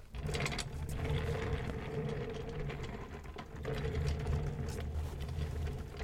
rolling in chair 1-2
rolling on a rolling chair
a, chair, rolling